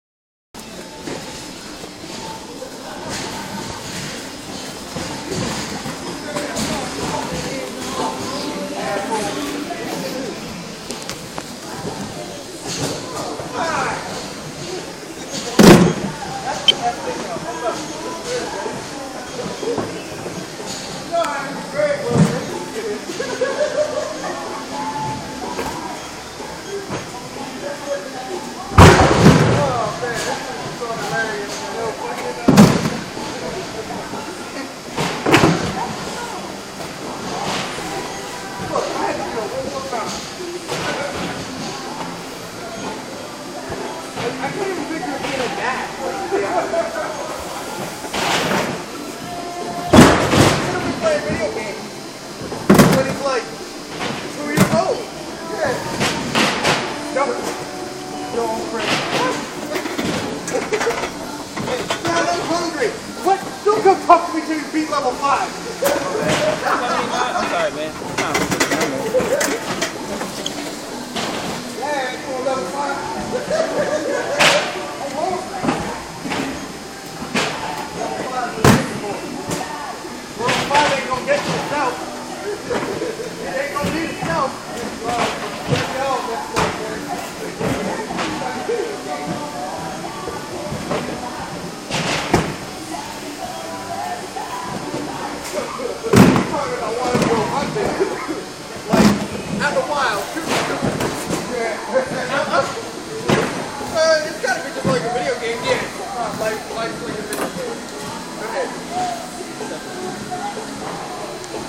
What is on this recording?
Work Ambience
The sounds of a job I had a few years back. You can hear the lighting fixtures buzz and overall walla. Some banging of totes and boxes, laughing voices, general warehouse standard.
Warning may be created with Passion. Produced in a facility exposing content to Love and Care.